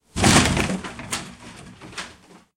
wood destruction
achieved by layering a few sounds, including a smack to my door and a few wooden plunks being dropped on asphalt
smack, hit, destruction